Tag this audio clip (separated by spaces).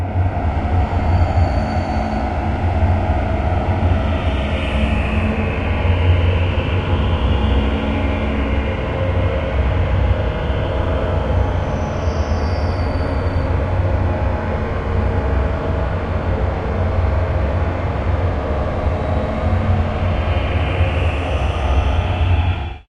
funky bird nature birdsong